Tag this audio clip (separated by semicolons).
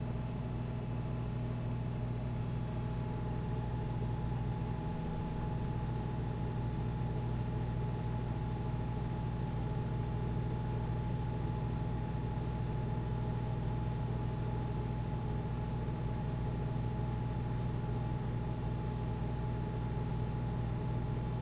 Appliance; Fridge; Hum; Kitchen; Refrigerator